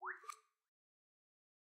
The Switch 4
Tweaked percussion and cymbal sounds combined with synths and effects.
Oneshot, Percussive, Effect, Switch, Percussion